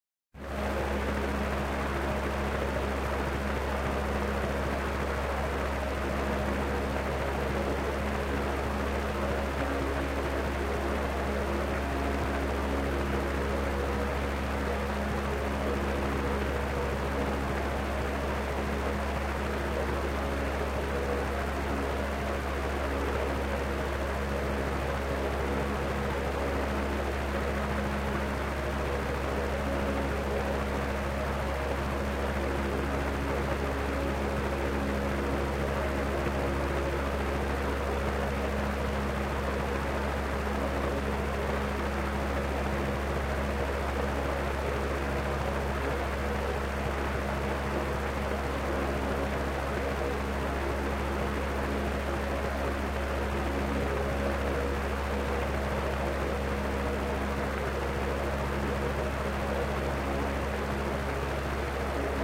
Airplane Sound
A sound of a fan up close sounding like an airplane.